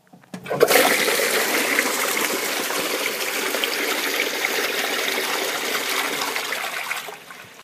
MySound GWECH DPhotography
Lake, TCR, Water